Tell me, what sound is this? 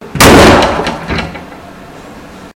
Door Slam
This is the sound of my dorm room door being slammed as heard from the hallway side
Recorded on a cannon camcorder
Door, Dorm, Loud, Room, Slam, Slamming